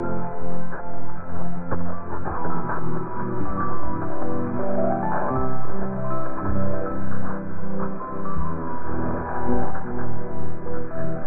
Which was a recording of a shortwave broadcast in AM mode received in USB mode 1khz off frequency. I used Goldwave's mechanize feature at 10000KHZ, then applied low pass filter at 10000 khz to filter out the newly created upper sideband, then I used mechanize again at 9000 khz, which tuned in the recording just like if I had a tuneable receiver.